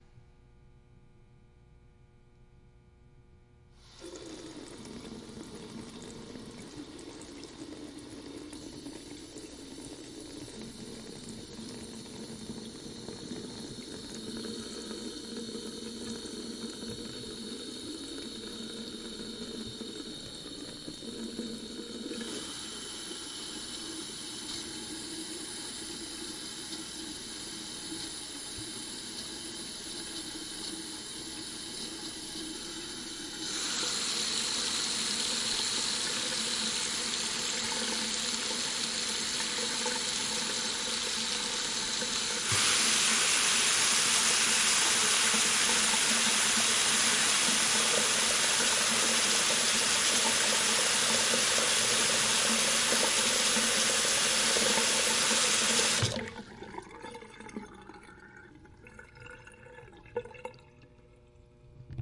Running Water, various pressure
Public bathroom running faucet at different levels of pressure
faucet, public, sink, running, bathroom, water, drain, drip